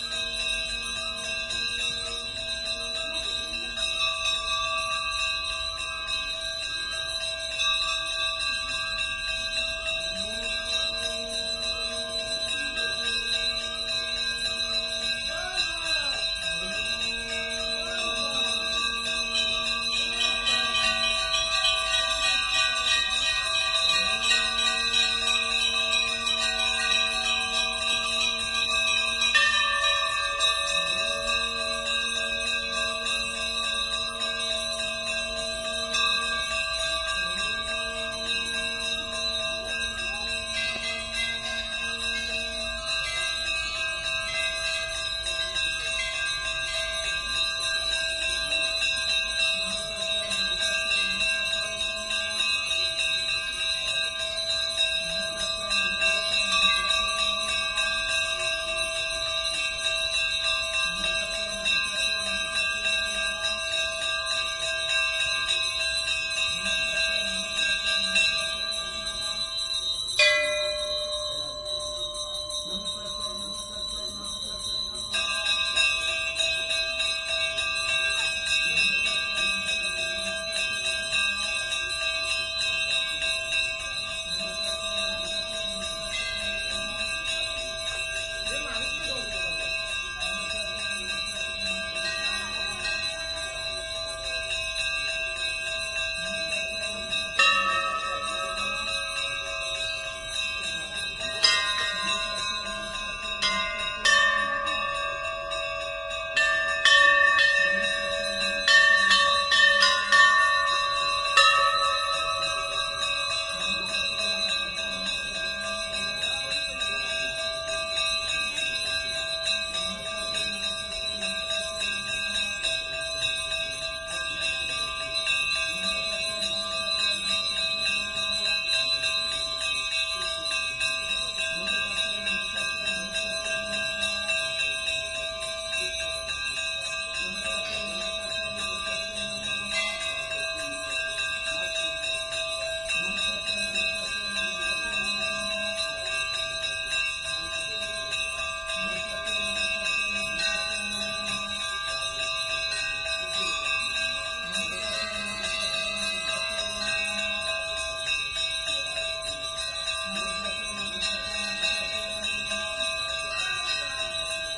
Tiger Hill Extract
Recording made in Tiger Hill Temple, Darjeeling: visitors walk round the temple ringing dozens of bells.